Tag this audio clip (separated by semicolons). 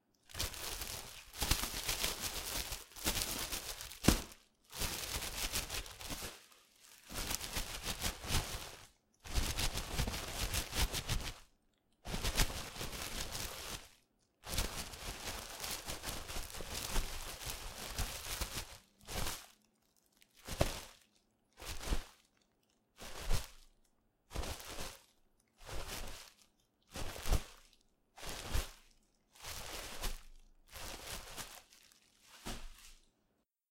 plastic; grabbing; paper; fidget; grocery; holding; shopping; hold; shopping-cart; packaging; rustle; land; landing; toilet-paper; rustling; fidgeting; grab; groceries